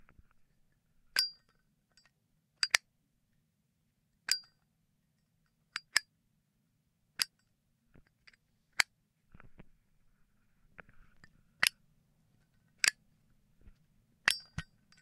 Playing around with a zippo lighter case
Sound-effects, Lighter, Zippo